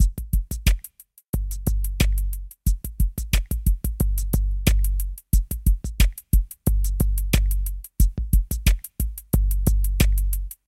Don Gorgon (Drums)
Don Gorgon F 90.00bpm (Drums)
Rasta, Reggae, Roots